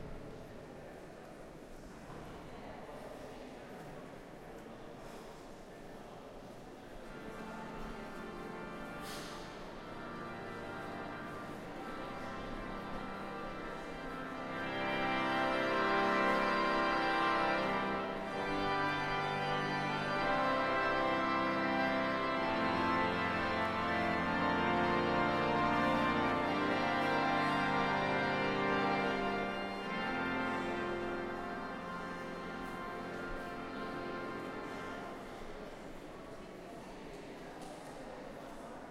Budapest Cathedral Organ with Tourist Noise 1
H1 Zoom. Cathedral at castle in budapest with tourists with someone playing the organ every now and then